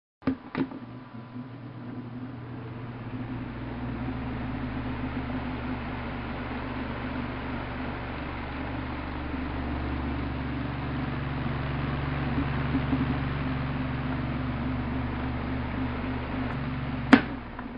house fan1
Recording of a regular standing house fan. Microphone was placed behind fan blades, recording came out pretty clear.
(fan is rotating in the recording)
standing, fan